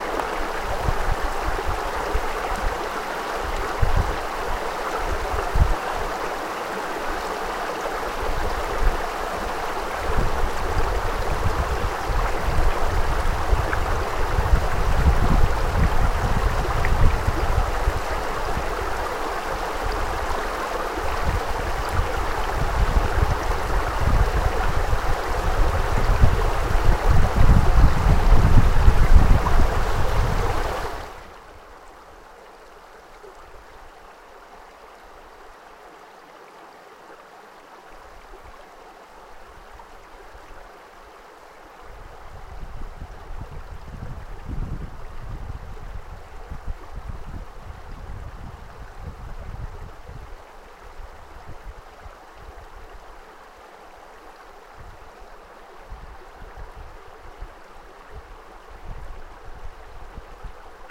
Recorded with a zoom r8 on the banks of the river Usk